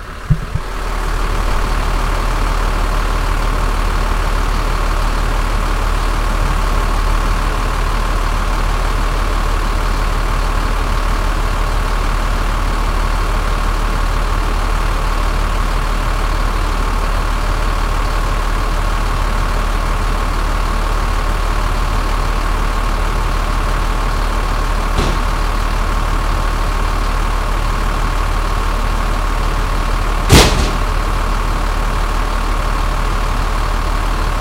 Diesel engine of a Freightliner truck unloading freight at the local grocery store recorded with HP laptop and Samson USB mic from inside my car.